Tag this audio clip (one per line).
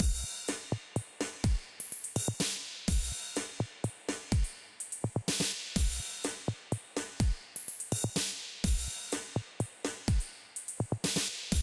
beat
braindance
drum-loop
electronica
free
idm